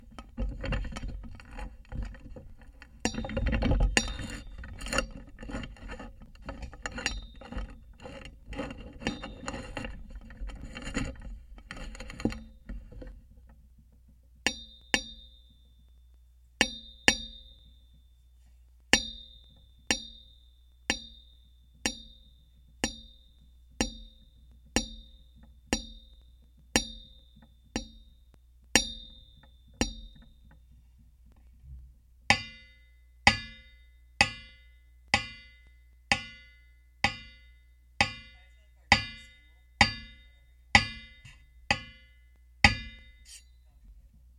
contact floor lamp
hitting a floor lamp with a contact mic attached to it
contact-mic, piezo, struck, metal, ring, ping, metallic, strike, clang, ting, bell, ding